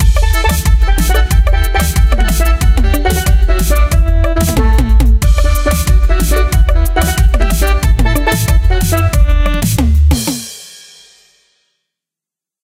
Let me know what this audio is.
Blast O' Reggae
"Oh yah, man. We had a veddy goodah time in dat place!"
A cute reggae intro made in FL Studio, by me, Spenny. I used samples from 3x Osc, Sytrus, and several different EQs and stock drum patches from FL Studio. Processed in Audacity. You know the drill.
bass, beat, blast, bump, dance, drum, electric, electro, electronic, house, loop, music, peace, reggae, synth, techno